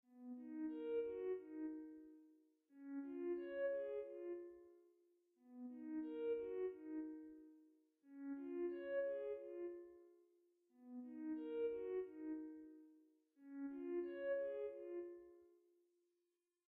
Created in FL studio
The first loop ive ever created to fit a 8-bit rpg town.
The melody was used to help layer other loops on top to compose a full song
loop, rpg, theme, town